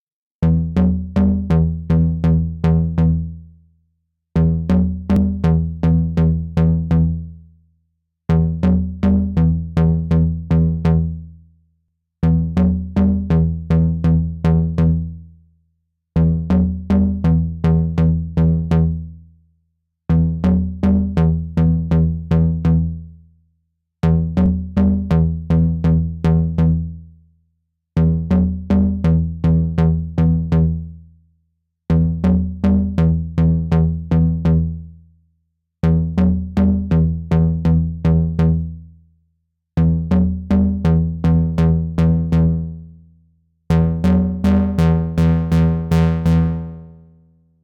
Some recordings using my modular synth (with Mungo W0 in the core)
Mungo, Modular, Synth, W0, Analog